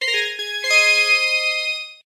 A notification sound for when you have successfully paired or are connected
connected, good, notification, positive, success, win